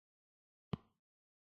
Sound of a single finger tip on a touch screen. Recorded with H2n, optimised with Adobe Audition CS6. Make sure to check the other sounds of this pack, if you need a variety of touch sreen sounds, for example if you need to design the audio for a phone number being dialed on a smartphone.
touch-screen, screen, touch